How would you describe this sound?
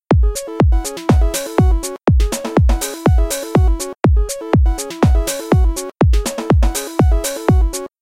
rind a casa
Synth melody loop on detroit-house style drums
kick, dance, drum